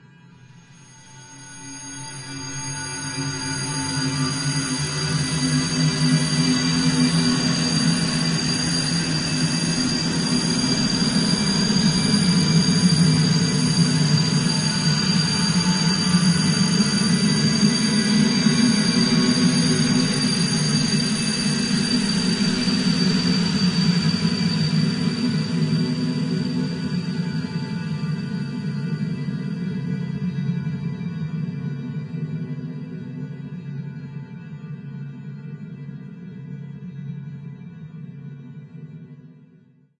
LAYERS 022 - Giant Dust Particle Drone-123
LAYERS 022 - Giant Dust Particle Drone is an extensive multisample packages where all the keys of the keyboard were sampled totalling 128 samples. Also normalisation was applied to each sample. I layered the following: a soundscape created with NI Absynth 5, a high frequency resonance from NI FM8, another self recorded soundscape edited within NI Kontakt and a synth sound from Camel Alchemy. All sounds were self created and convoluted in several ways (separately and mixed down). The result is a dusty cinematic soundscape from outer space. Very suitable for soundtracks or installations.